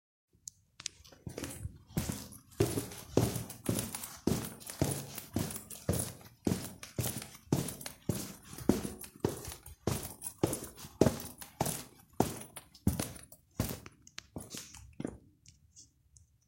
Footsteps - Stairs
Walking up concrete stairs
steps, shoes, foley, walk, stomp, footsteps, indoors, climbing, walking, boots, concrete, stairs